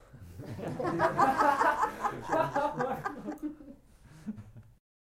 Small group of people laughing 1
Small group of people chuckling.
Recorded with zoom h4n.
chuckle, female, group, human, laugh, laughing, laughs, male, people